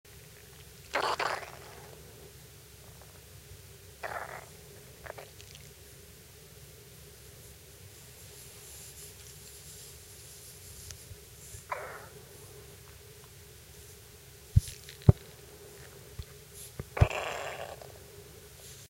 The sounds of a dog's stomach grumbling.